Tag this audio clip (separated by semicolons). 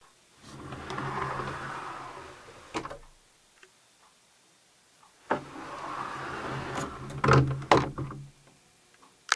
close; closet; closets; closing; door; doors; open; opening